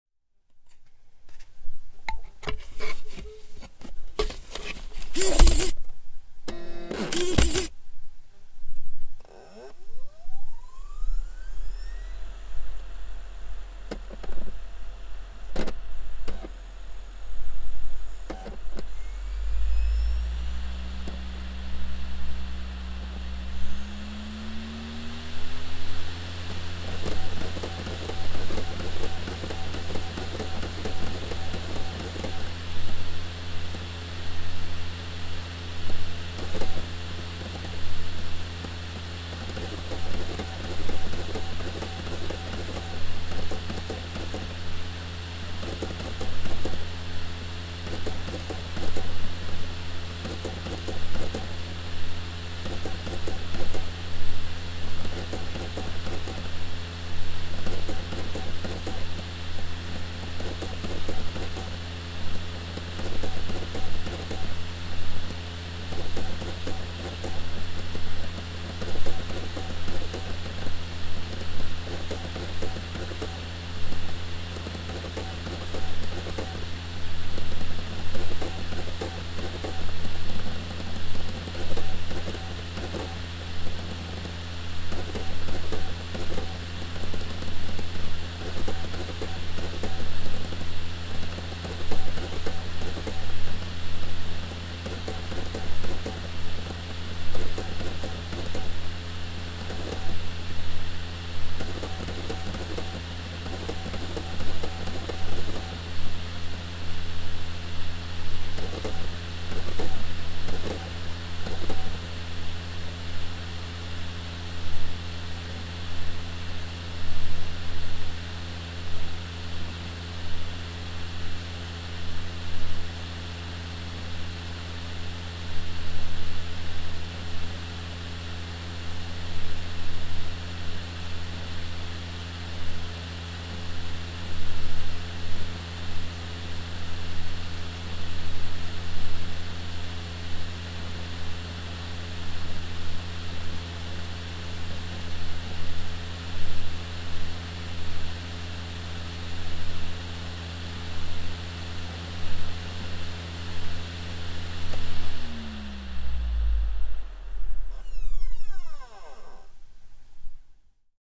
Apple USB SuperDrive reading a DVD, recorded with Aston Spirit condenser microphone in cardioid setting.